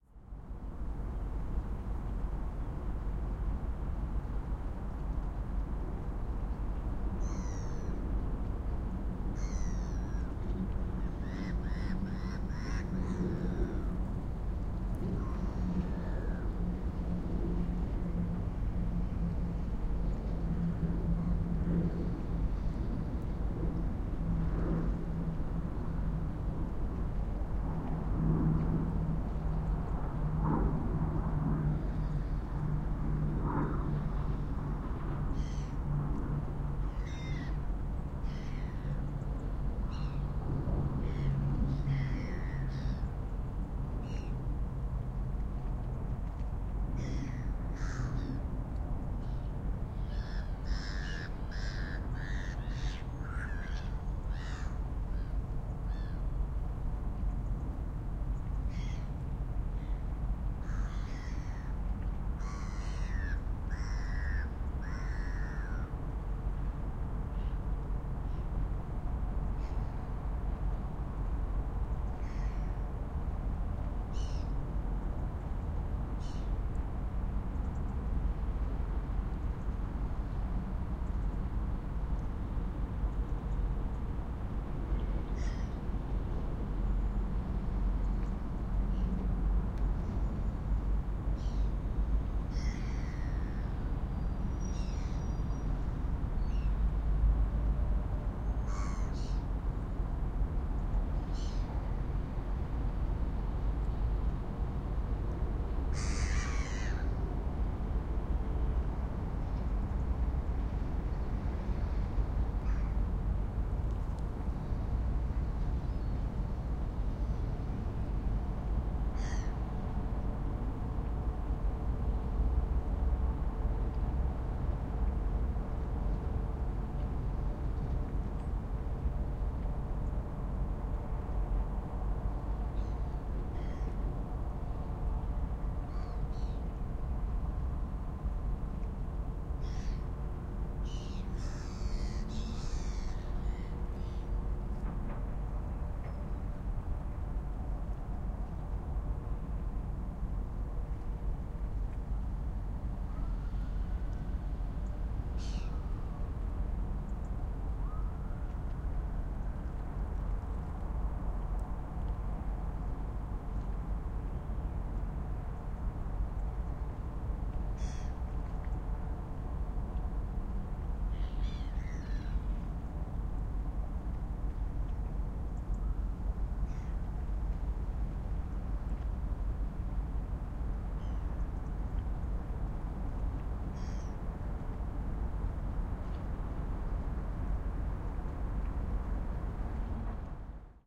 Mayflower Park soundscape
Distant gulls, boats and traffic.
Zoom F3, Pluggy XLR mics